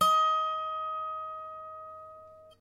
lap harp pluck